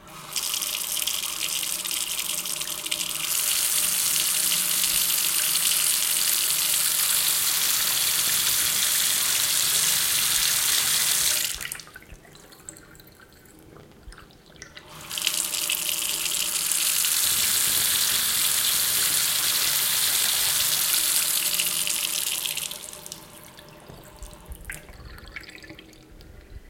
running faucet / abrindo torneira
bathroom, water, sink, drain, running, faucet